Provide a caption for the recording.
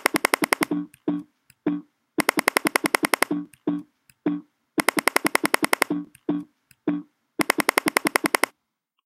RIVIERE Anna 2017-2018-Aliens War
To create this sound, I recorded myself taping on my computer keyboard. Then with Audacity I reduced the ambiant sound and I isolated two sounds that were completely different. Then I decided to associate both of them. One remembered me the sound of the plastic games when someone pulled the trigger. And the other one sounded as if there was a notification on a computer.
So, I copied the first sound several times and I added the other sound after it. In order to create a rhythm I added some silence and I repeated the second sound three times. I also modified the low and high sounds to make sure that one sound was louder than the other.
This association made me think of a plastic guns struggle. It reminds me of the aliens war with weird sounds that come from galactic arms.
Typologie/morphologie de P. Schaeffer
Descriptif : Ici on entend à trois reprises des impulsions toniques (N’) avant que ne se fassent entendre plusieurs impulsions complexes (X") répétées.
galactic, science-fiction, war, aliens, toys, guns, fighting, plastic